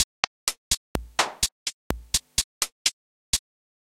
static-like percussive drum sounds
drum, fx, hat, percussion, pick, sharp, static